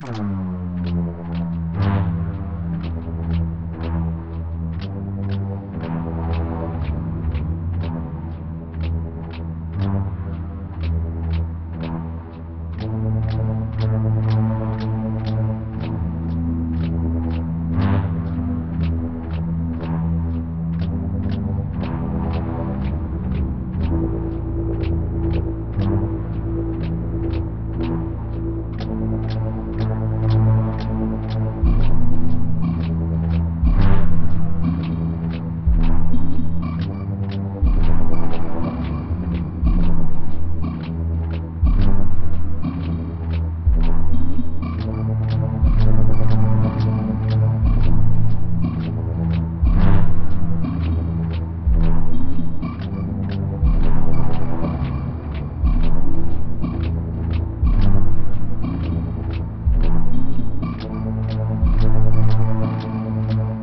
Glorious orchestral loop with modern spices.
Recorded in Cubase.